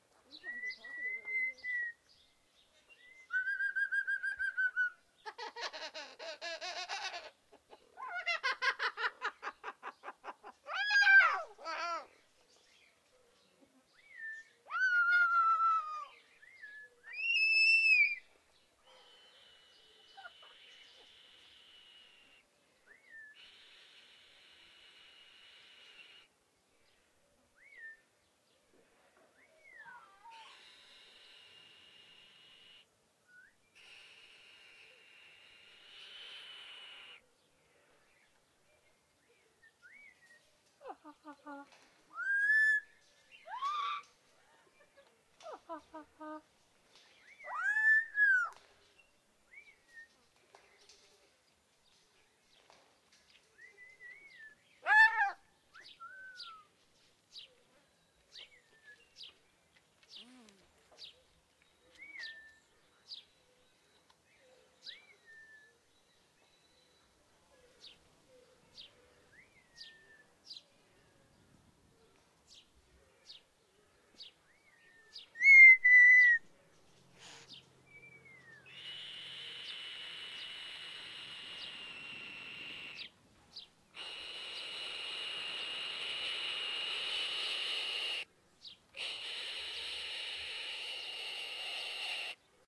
Cockatoo Chatter 03
Recorded with an Rode NTG 2 shotgun and Zoom H2. A chatty cockatoo
Animal, Cockatoo, Hiss, Parrot, Screeches, Vocalisations, Whistles